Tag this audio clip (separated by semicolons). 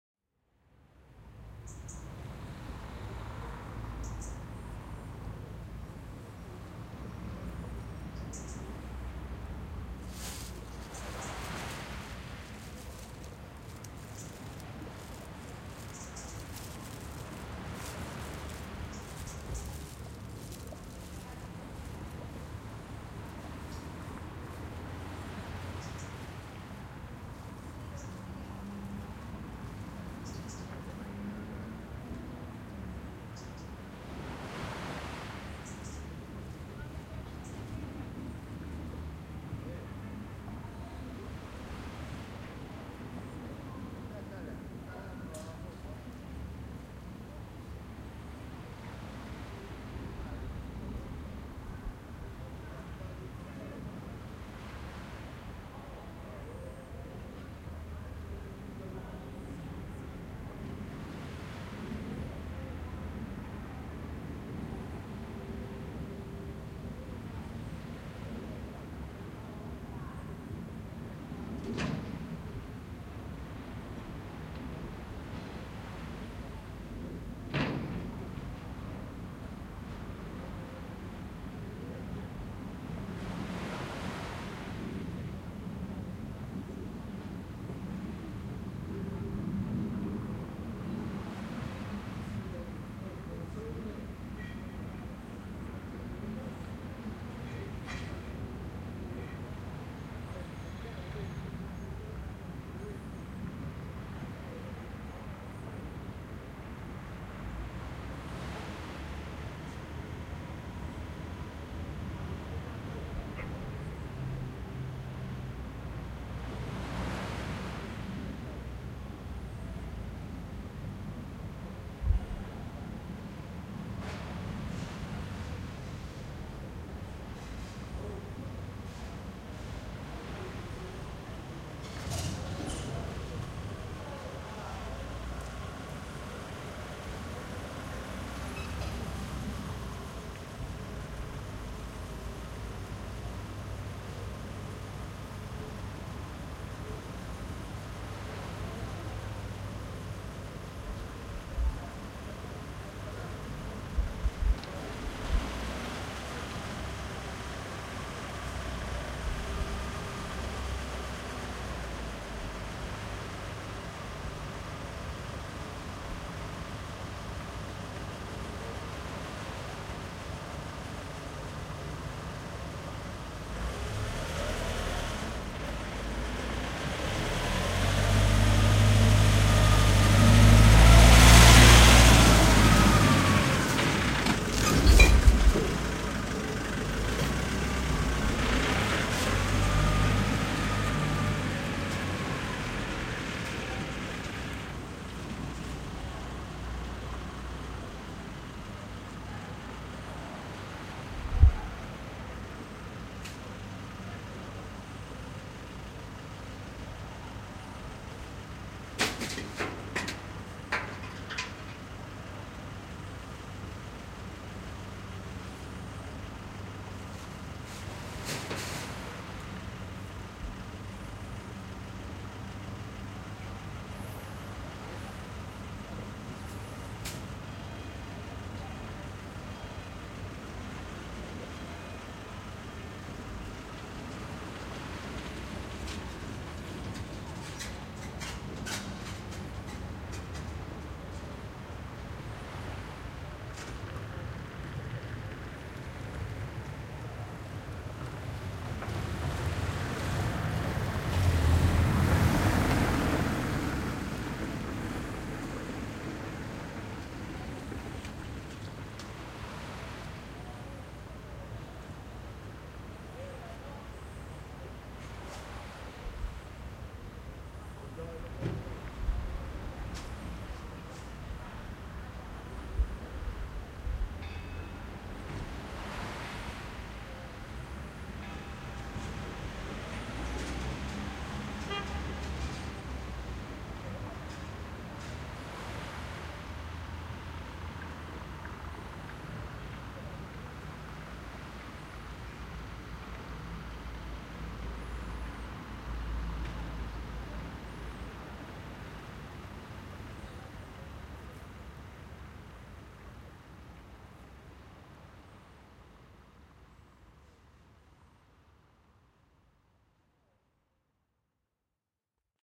Castellammare-del-golfo; leaves-rustling; nature; sea